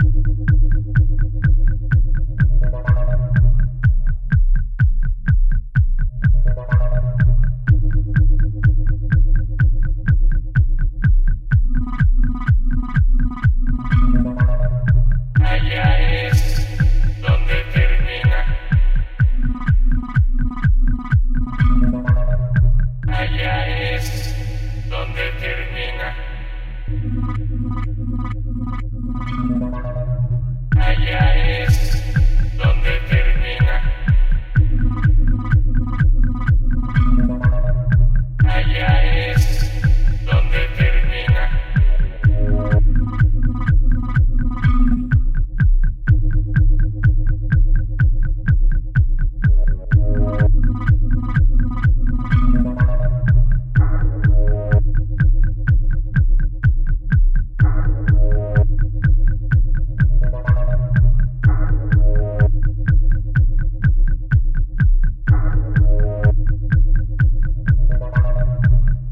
This is part of the Electro Experimental. Peace and tranquillity with a lovely vocal (mild or Chilled TECHNO-HOUSE;).
and PSYCLE - recorded and developed October 2016. I hope you enjoy.
HOUSE, electro, experimental, drum, drum-bass, rave, bass, glitch-hop, electronic, synth, TECH, loop, effect, blippy, ambient, Bling-Thing, bounce, loopmusic, hypo, tech-house, techno, game-tune, beat, intro, game, dub, gaming, club, trance